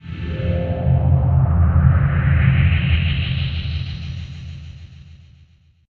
Space Flight Sound Effect
ambience, ambient, filter, flight, futuristic, space-ship, sweep, synth, synthesizer